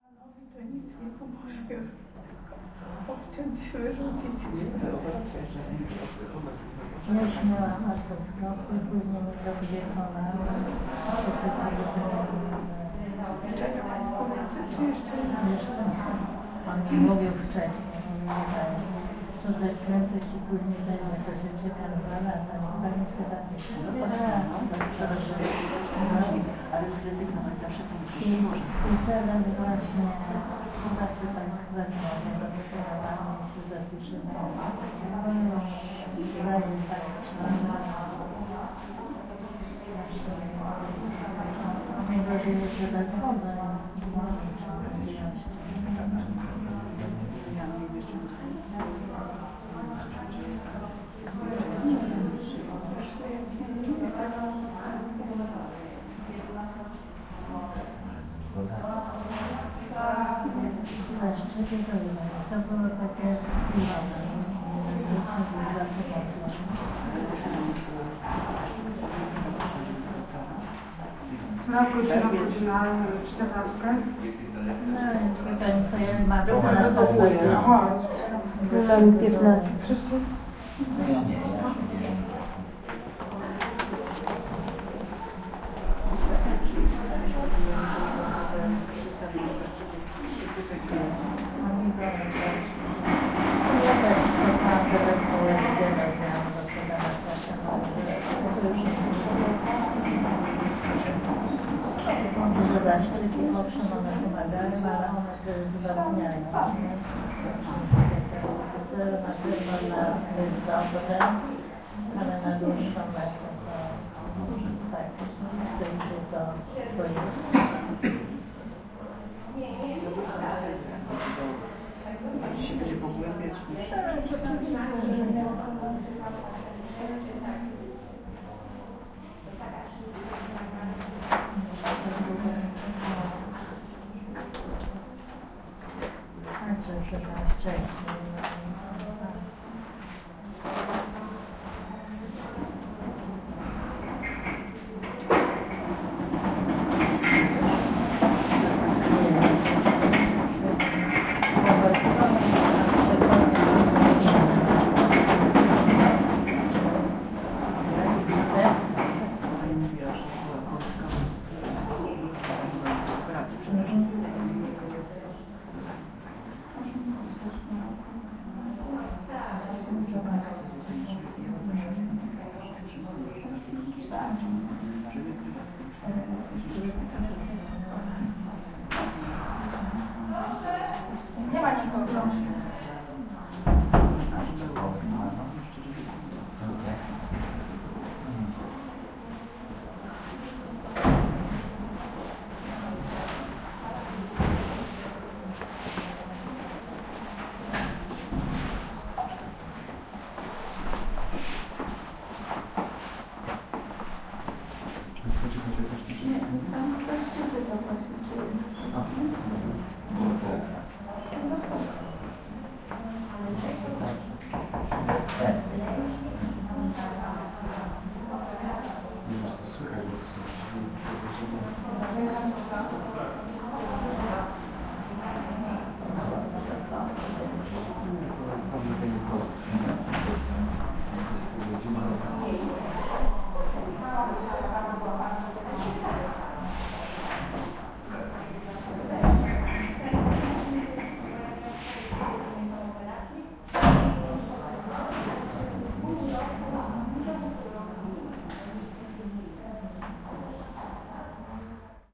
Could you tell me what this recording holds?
17.03.2016: around 15.20. Ambience from the medical center in Bydgoszcz (Poland). Recording made by my student Joanna Janiszewska.
waiting-room, fieldrecording, waiting, chatting, outpatient-clinic, Bydgoszcz, medical-center, Pozna, ambience, NFZ